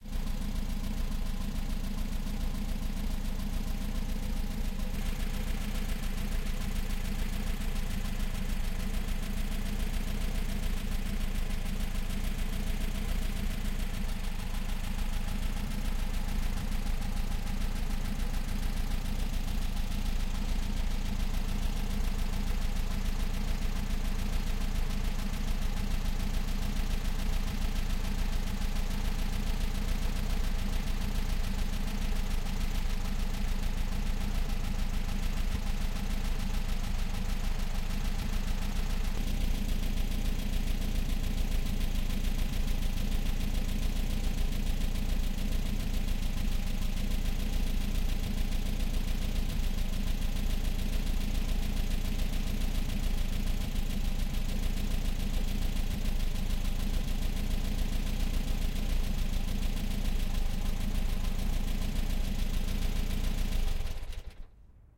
1977 VW Volkswagen Fusca Motor
1977, Auto, Car, engine, Fusca, Ignition, MOTOR, start, VW